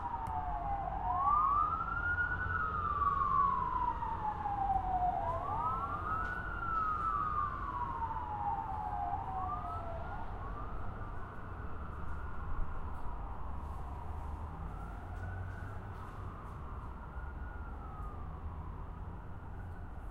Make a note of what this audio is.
recorded sounds on downtown newark from 30 floors up off the street
scraper, newark, wind, top, police, traffic, fire, building, truck, cars, morning, sky, sirens